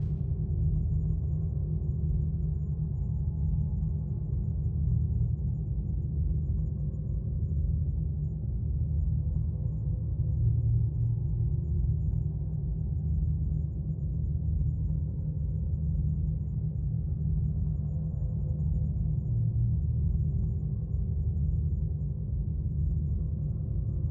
A machine like sound that I make with Reaktor5. It make me remember the main menu of a game called Thief.
low, noise